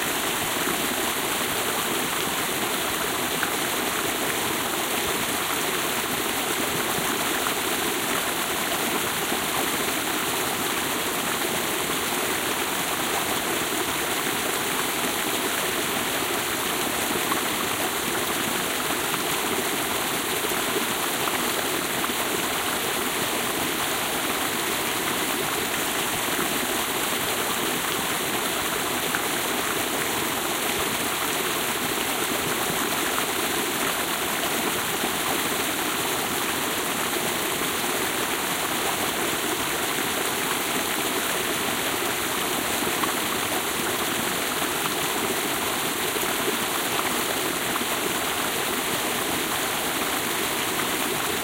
Murmuring, babbling, burbling and brawling brooks in the Black Forest, Germany.OKM binaurals with preamp into Marantz PMD 671.
water, forest, flowing, brook, creek